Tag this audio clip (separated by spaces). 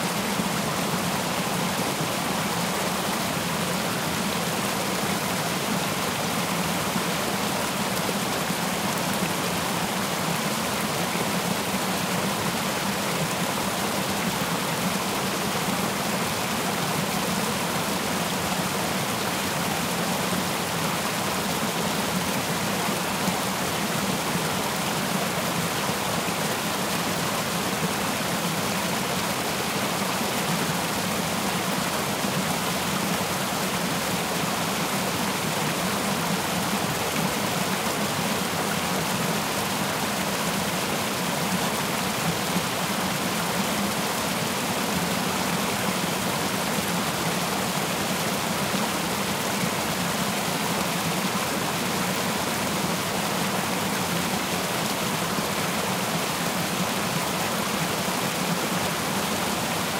Ambience Background Creek Dam Flow Mortar Nature River Splash Stream Water Waterfall